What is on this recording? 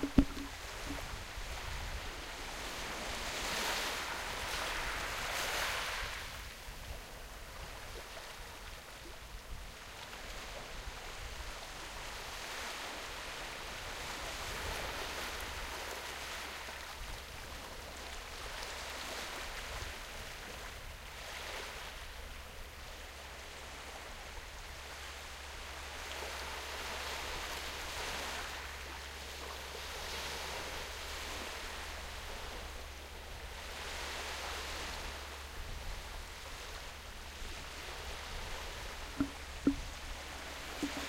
Fieldrecording waves HDUK
A recording of waves made at St Abbs Head, Scotland